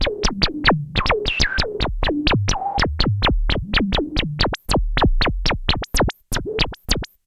Poly800 HighRes Blibz
Self created patch on my Korg Poly 800 MKI (inversed keys, as if that would matter ;))
800, inversed, keys, korg, mk1, mki, poly, poly800